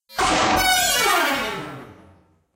Strange sound with a glassy, tingly kind of reverb tail.
Maybe useful as it is for special effects, but most likely to be useful if processed further or blended dwith other sounds.
This is how this sound was created.
The input from a cheap webmic is put through a gate and then reverb before being fed into SlickSlack (an audio triggered synth by RunBeerRun), and then subject to Live's own bit and samplerate reduction effect and from there fed to DtBlkFx and delay.
At this point the signal is split and is sent both to the sound output and also fed back onto SlickSlack.
SlickSlack, FX, feedback-loop, special-effects, raw-material, audio-triggered-synth, RunBeerRun, Ableton-Live